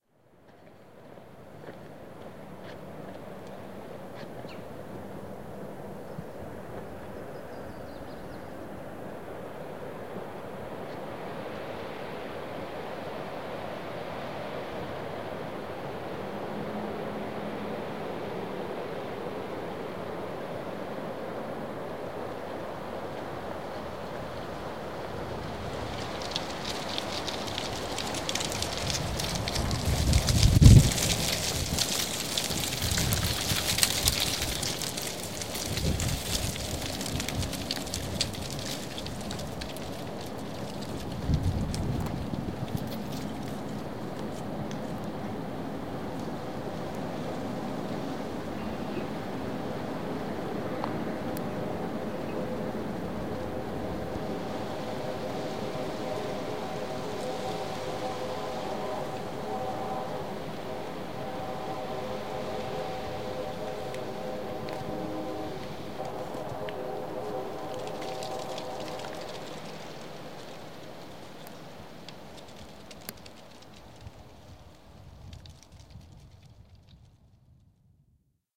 A fairly decent recording -- in my opinion --- of wind blowing through oak tree branches and rattling dead leaves.
Recording made on Saturday April 6th, 2013 using my Zoom H4N recorder.
My microphone, Rode NT2, was mounted inside my homemade parabolic reflector.
Enjoy